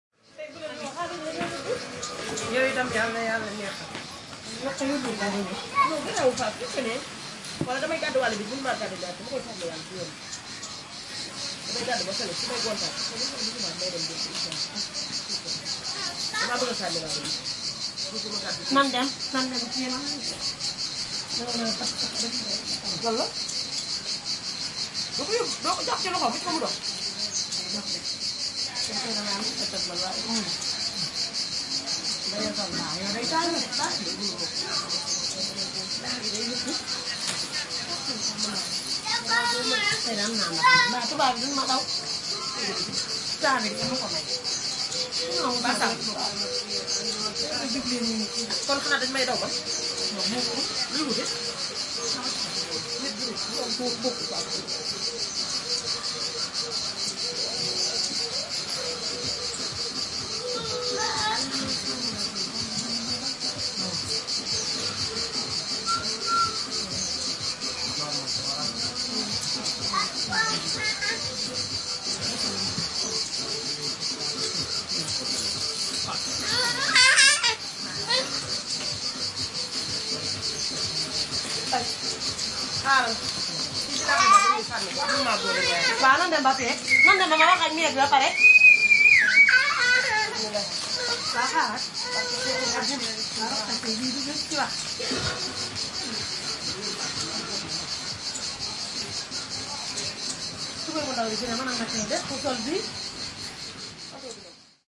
Voices African women behind the junipers of the beach of "Cala Ginepro" (Orosei, Sardinia). Recorded by Zoom H4.
African, Ambience, Beach, Italy, Junipers, Sardinia, Sea, Voices, Women